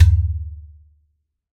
This pack samples a Middle-Eastern hand drum called an Udu. To achieve a stereo effect, two drums of different pitches were assigned to the left and right channels. Recorded articulations include a low open tone, a high open tone, a strike on the drum's shell, and a pitch bend. You can also find seperately a basic rhythm loop if you browse my other uploaded files. Feedback is welcome and appreciated. Enjoy!
acoustic, drum, hand, middle-east, percussion